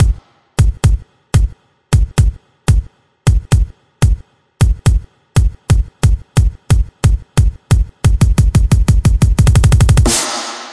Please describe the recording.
Stepping Build2Snare
Taken from a track I produced.
FOLLOW FOR FUTURE TRACKS!
All samples taken from the song: I am with you By: DVIZION
DnB, Lead, Melodic, Rythem